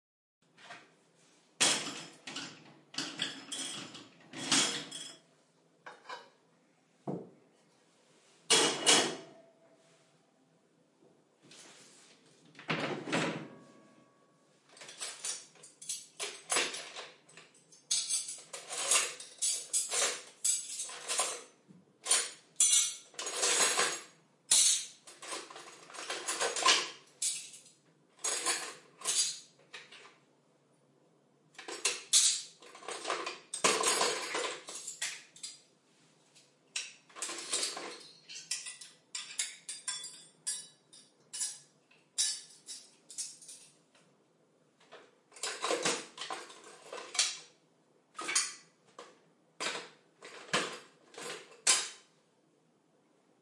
AMBKtchn sorting smaller kitchenware clattering
Here you can hear the process of me sorting out the dishes and small kitchenware. You can use this sound for your kitchen ambience bed to create a feeling of hustle and people working offscreen in a restaurant or some place like that.
ambience, household, active, field, cafe, recording, performed, restaurant, kitchen